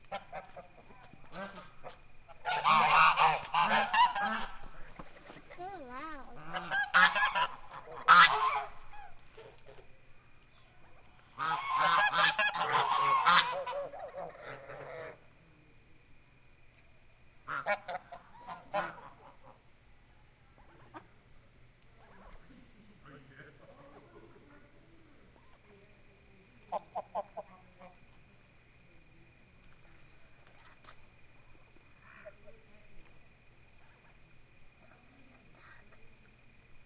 cibolo geese01
Geese honking at Cibolo Creek Ranch in west Texas.
animal, geese, honking, texas